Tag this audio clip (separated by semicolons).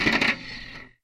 contact-mic; machine; percussion; field-recording